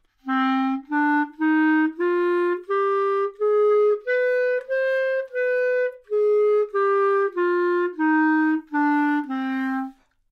Clarinet - C natural minor - bad-tempo-staccato
Part of the Good-sounds dataset of monophonic instrumental sounds.
instrument::clarinet
note::C
good-sounds-id::7716
mode::natural minor
Intentionally played as an example of bad-tempo-staccato
clarinet, Cnatural, good-sounds, minor, neumann-U87, scale